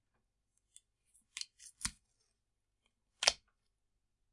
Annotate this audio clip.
Tape dispencer
A recording of me taking a piece of tape from a big stationary tapedispencer.
Recorded with a superlux E523/D microphone, through a Behringer eurorack MX602A mixer, plugged in a SB live soundcard. Recorded and edited in Audacity 1.3.5-beta on ubuntu 8.04.2 linux.